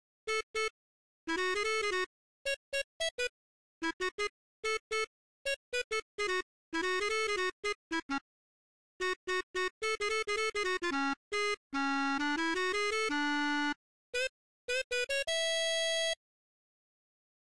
pesh-marvin
theme for a klezmer song with a fake clarinet